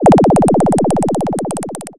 Kicking-effect
glitch-effect, glitch, rhythmic-effect, 8-bit, kicks